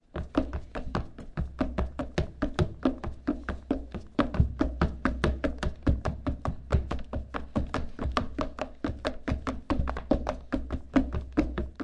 Footsteps; running; wood; sneakers; consistently close
Recording of running on wood with sneakers.